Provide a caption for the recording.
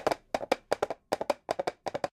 Caminar de personajes